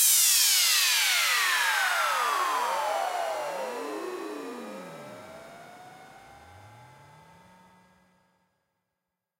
Noise Down 1
effect, fx, noise